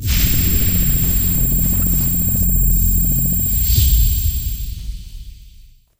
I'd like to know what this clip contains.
Strange teleport sound
A strange throbbing high pitched teleport sound.
screech, high, magic, teleport, sc, fi, pitched